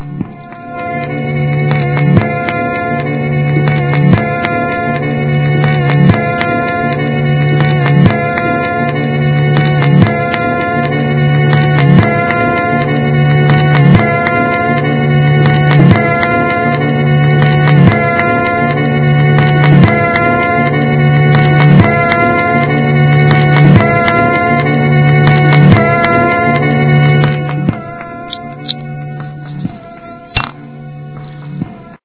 this is the eerie sound of a record skipping on a broken turntable.